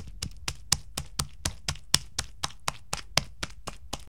slime noise 3 1
Slime noises done by J. Tapia E. Cortes